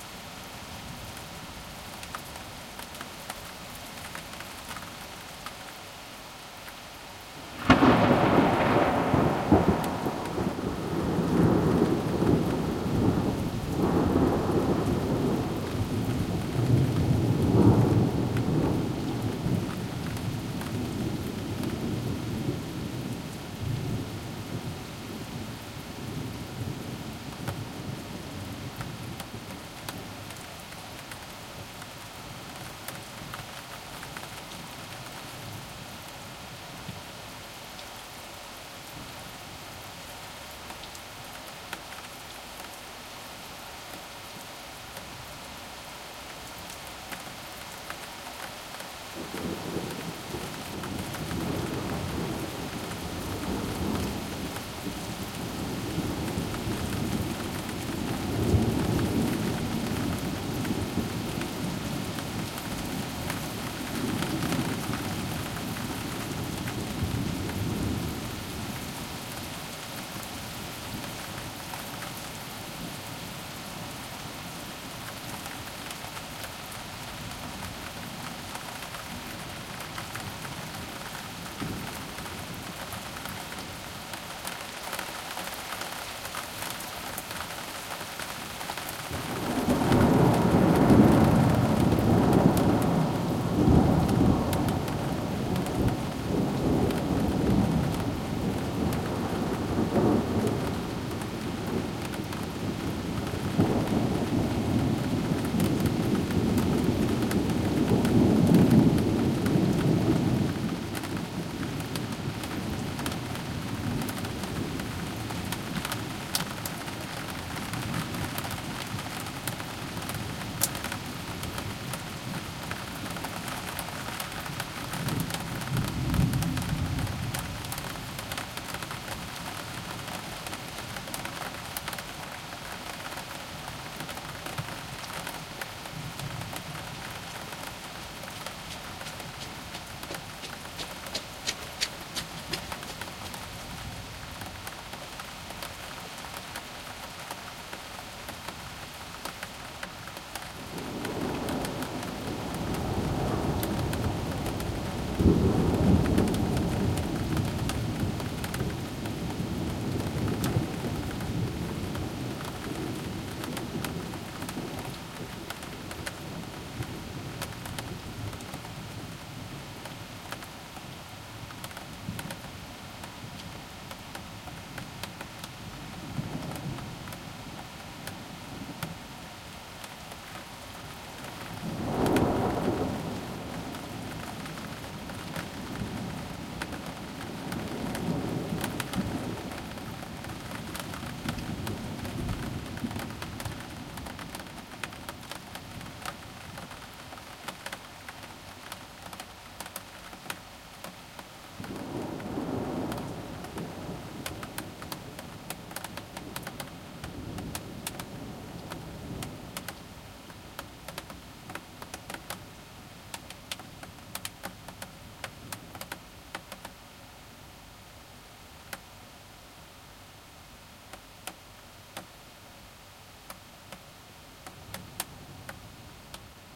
thunder & rain (Berlin august07)
A recording session from August 21st, 2007, in Berlin, germany.
full stereo recording from a window. Tapespeed was 19cm/sec. Some older OWRO tape used.
donner, rain, regen, thunder